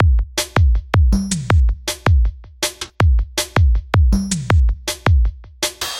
Drums loop fx160BPM-01
loop, drums, 160bpm